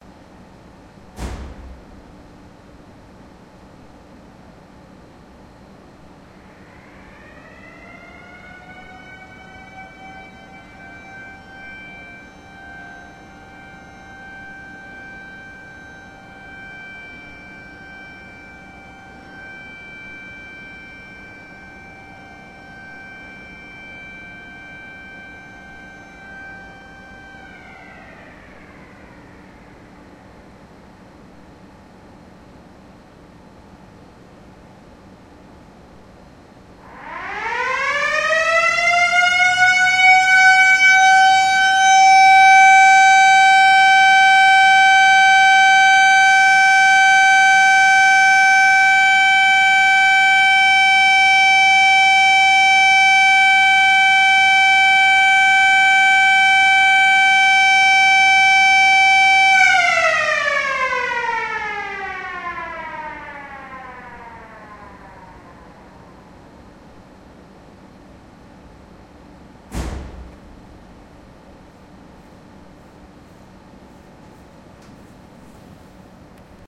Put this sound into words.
two small mechanical sirens recorded with a h2 recorder in a factory building. one siren is very close, the other in a remote place. you can also hear the sound of a door and ambient noise.